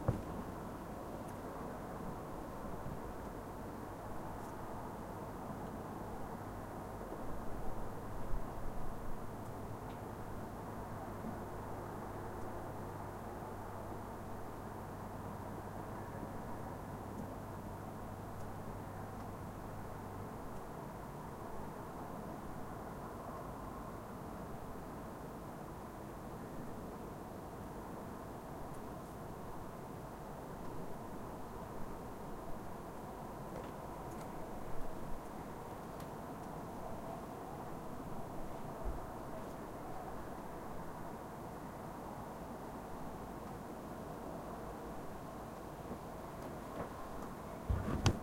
Roomtone With Window Open
Room tone of empty kitchen with window open on a summers day, birds and general city sound scape can be herd in distance.
ambience, ambient, atmo, atmos, atmosphere, atmospheric, background, background-sound, city-noise, general-noise, room-tone, soundscape